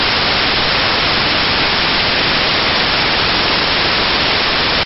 white noise2
general-noise, background, tv-noise, white-noise, noise, atmosphere, ambience, ambient, background-sound